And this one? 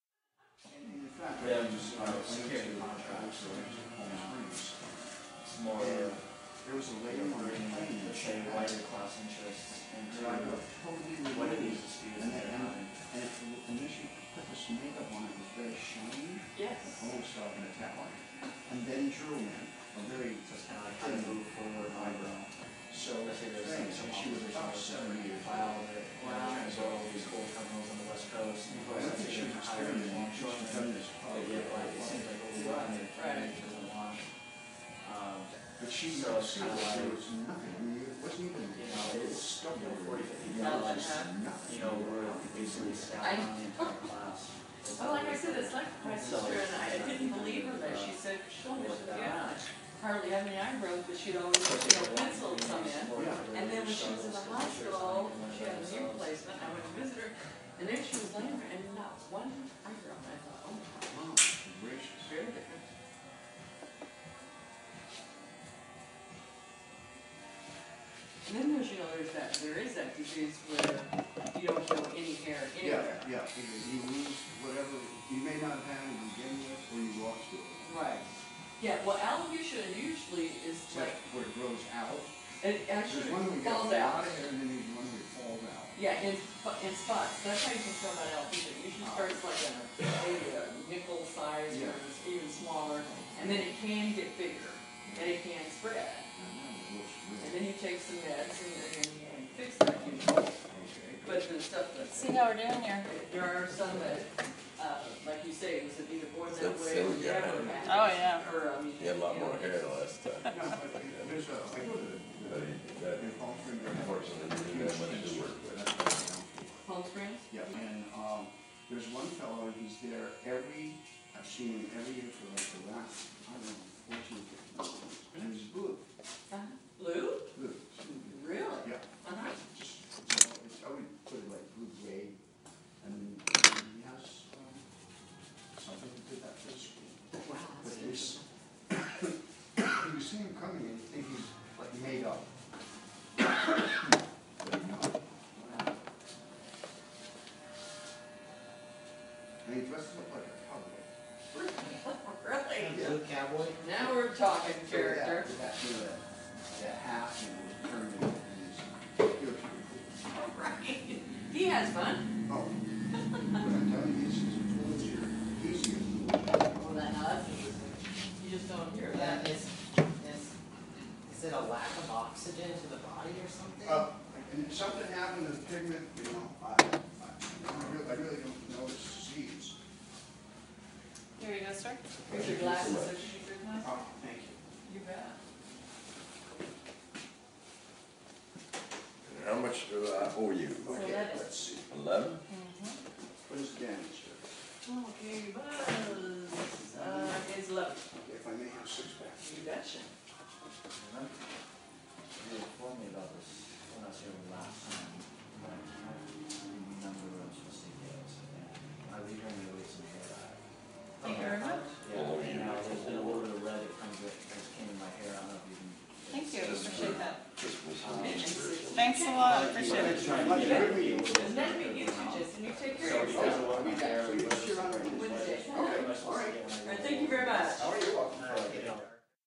barbershop ambiance-2
What it sounds like in your barbershop when there are several customers getting their hair cut. Listen in and hear snippets of their conversations.